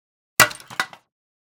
Clay Jar Break
Clay jar falls and breaks.